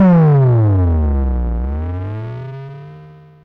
Vermona DRUM 10
From the Drum 1 Channel of the Vermona DRM 1 Analog Drum Synthesizer
DRM, Analog, 1, Synth, Drum, Vermona, Sample